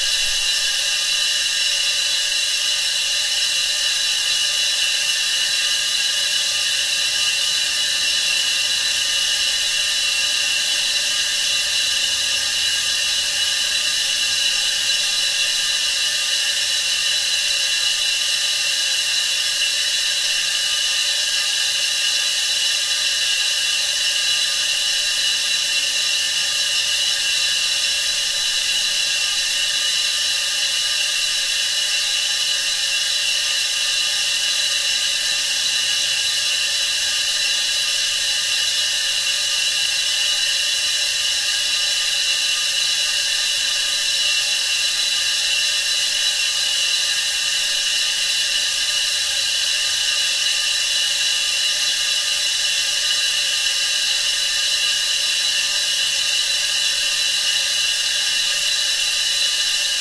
A terrible and annoying sound of gas or something going through some large pipes. Fairly obnoxious.